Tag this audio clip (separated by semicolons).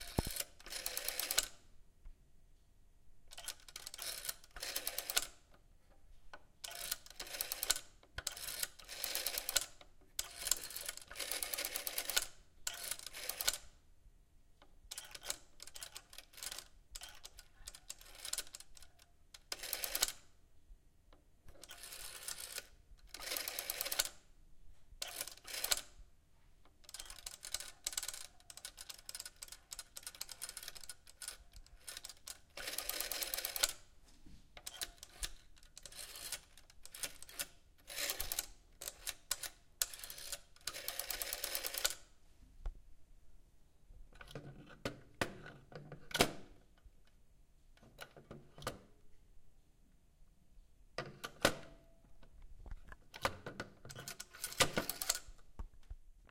dial; telephone